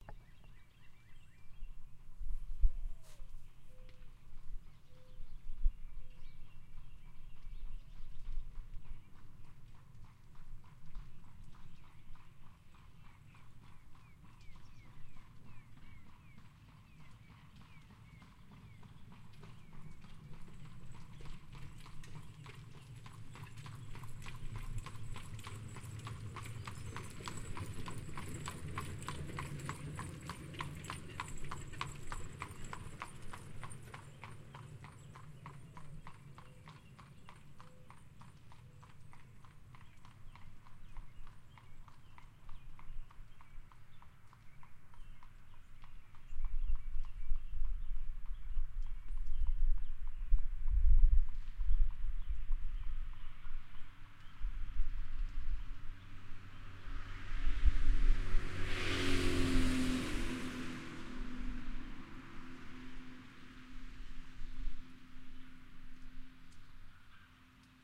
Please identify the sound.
Stationary mic on front porch recording an Amish buggy passing by on road.